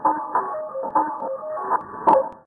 robotnoise1FINAL
Sounds a bit like a robot computing something (obviously a smaller, perhaps friendly or unaligned robot), or a computer, or a grocery store checkout. I originally got this sound from scraping a nail-belt along a plastic chair, and edited the clip in audacity. Enjoy!